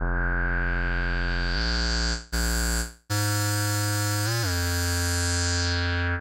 building bassline with a good shine to it, sounds a little jazzy to me, but could be tough if carried right.